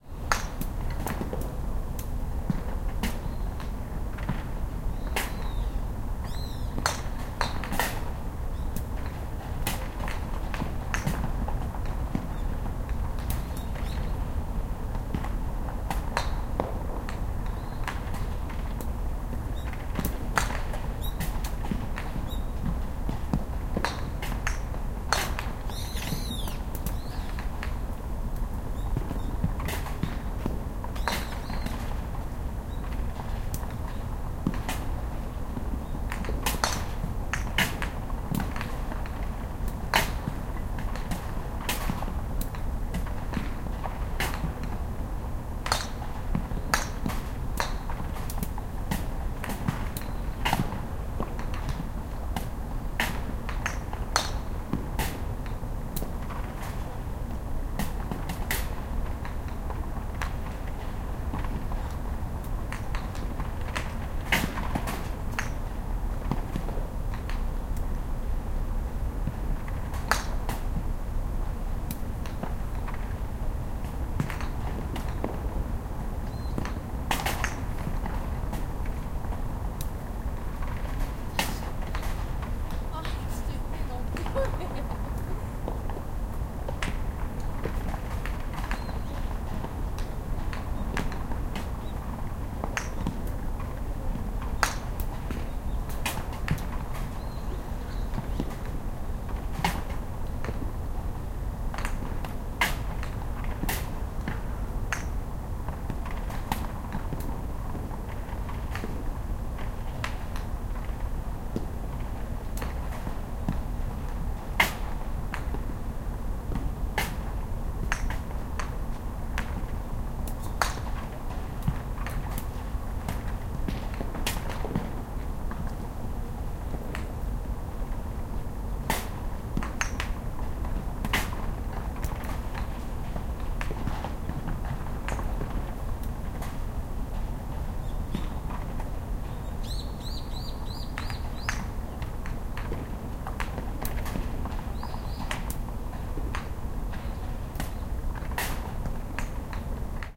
0065 Golf and birds
Playing golf, sounds of the balls and the grills. Birds. Some people talking
20120116